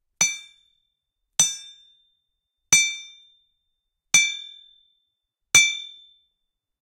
Hitting an old iron anvil with a large hammer. Recorded with a Zoom H5 and a XYH-5 stereo mic.